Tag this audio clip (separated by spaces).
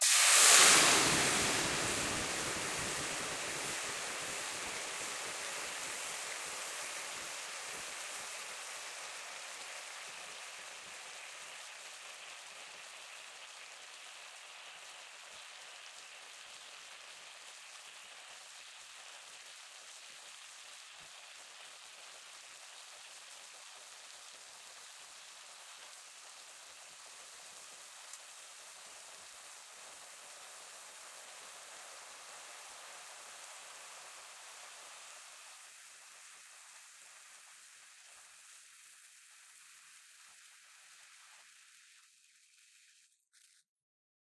hissing burning water heat bubbling